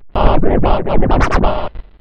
57927 Indu-Scratch
industrial, scratch, turntables